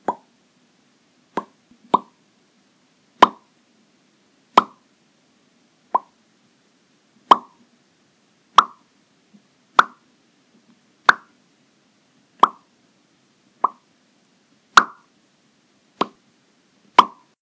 Popping noises of varying volume.